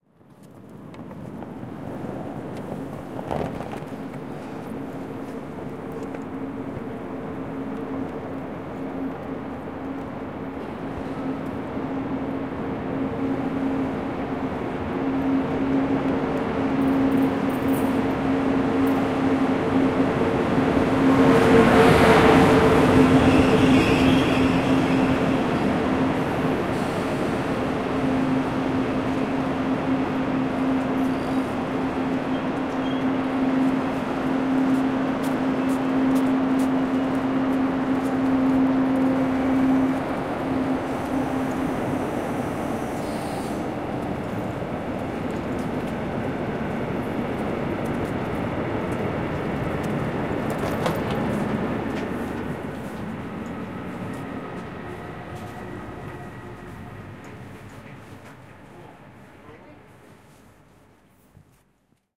train station, train approaching and stopping
Recorded with Zoom H4N, built-in microphones at 120°